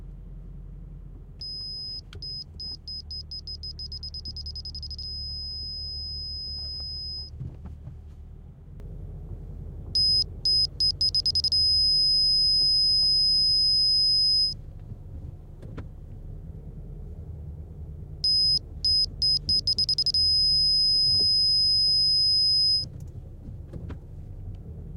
parking-sensors
Couldn't find this sound, so I recorded it my self. It's the sound the parking sensors make when car is in reverse and being parked.
beep, car, parking-sensors, reverse